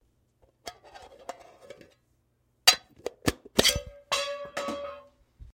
tin can top falling on ground